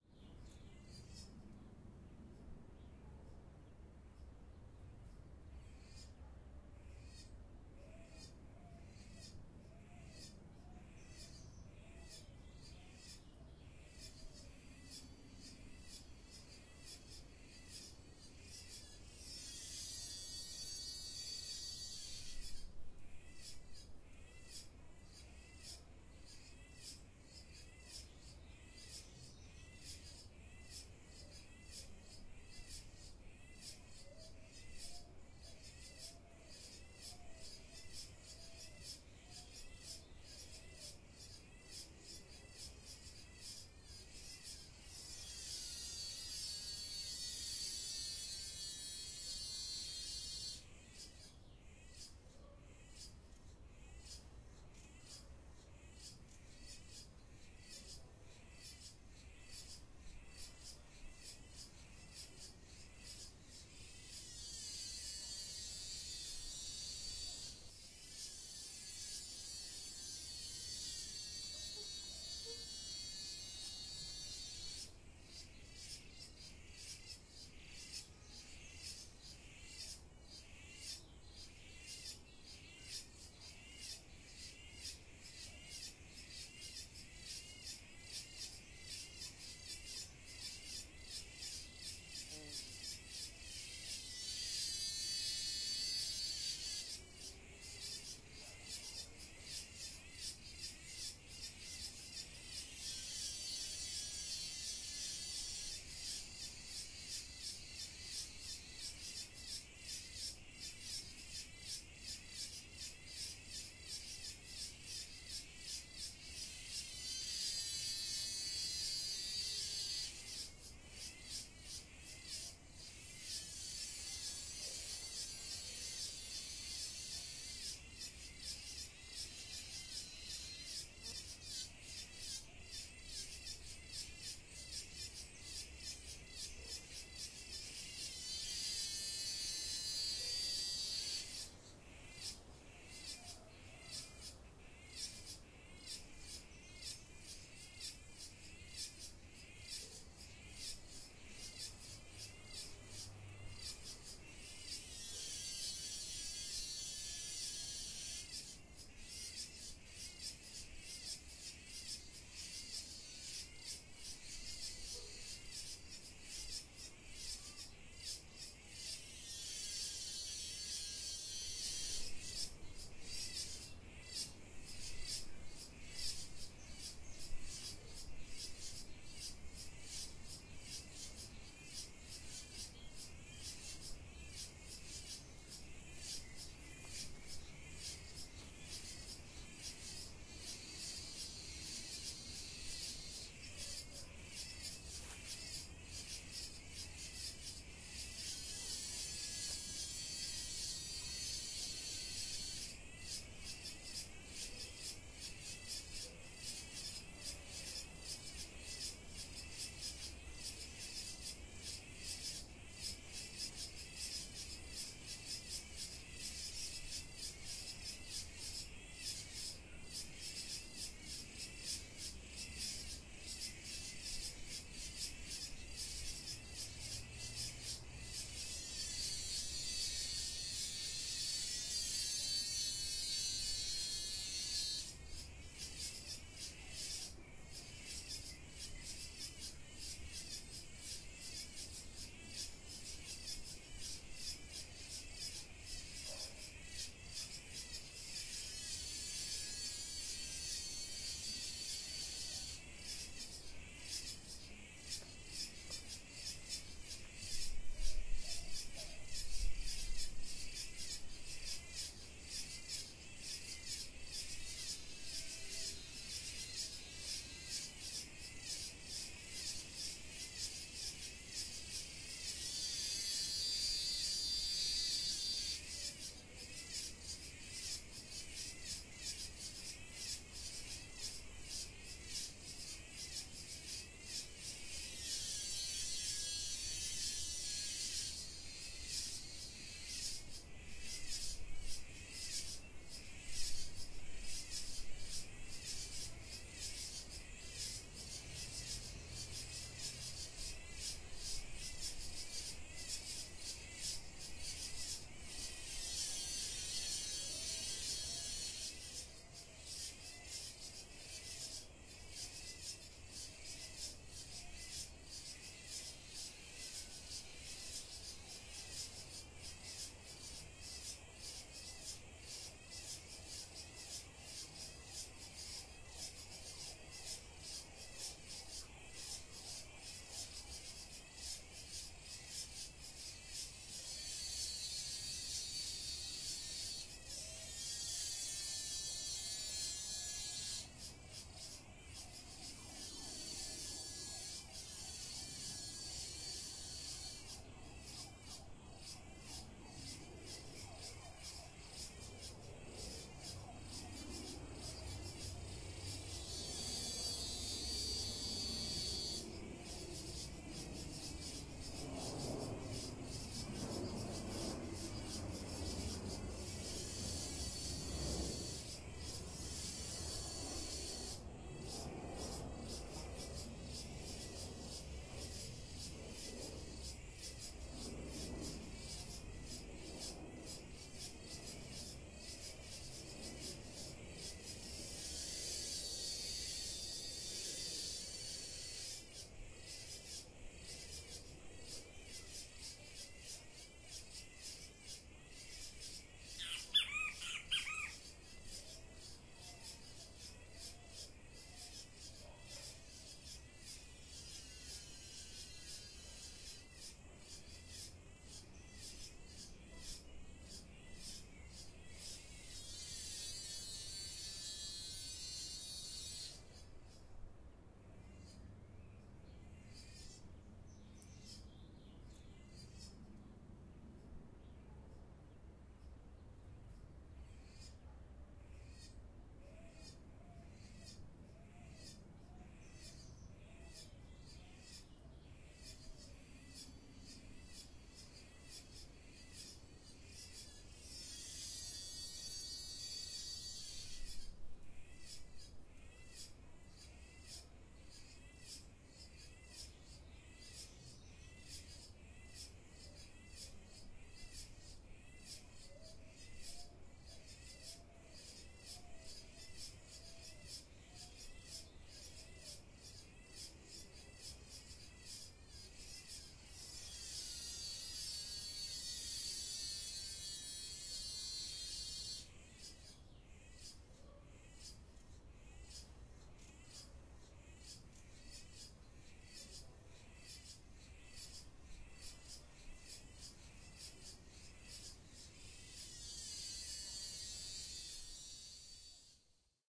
Chicharras Avellaneda
Chicharras del hemisferio sur durante el verano.
Ambiente exterior en la ciudad, grabado con micrófonos omnidireccionales de tascam dr44.
city, cicadas, verano, summer, ciudad, Chicharras